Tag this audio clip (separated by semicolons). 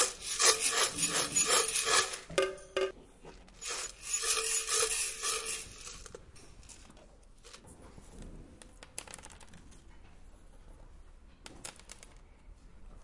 Box; Lamaaes; Mysounds